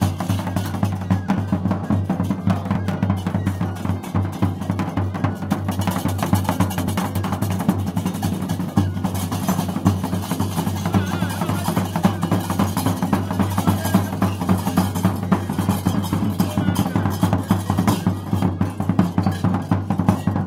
Marrakesh Ambient loop
African Music Recorded in Marrakesh. Loopable.
Recorded with a Sony PCM D50
african, arabic, loopable, marrakesh